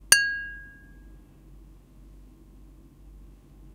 Knock on vase.
Recorded: 11-02-2013.
bottle, knock, tap, vase